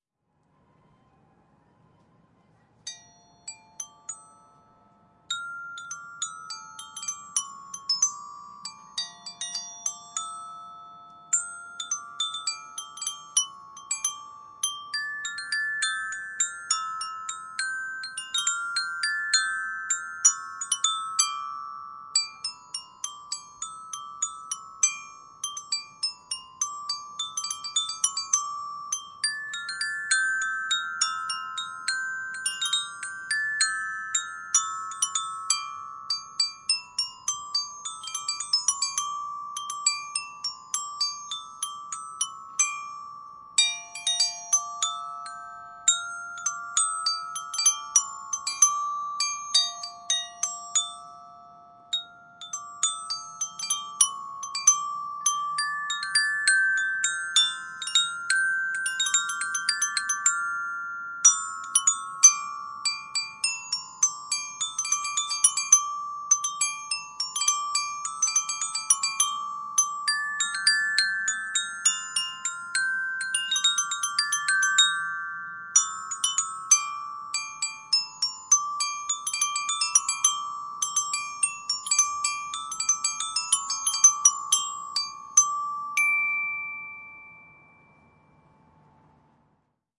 Street Musician Playing Renaissance Melody on Glockenspiel